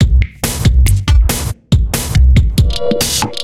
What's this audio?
Drums loop 140BMP DakeatKit-03
made by Battery 3 of NI
loop, 140bpm, drums